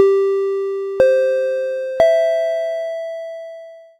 3-tone chime UP
A simple and short 3-tone chime going up.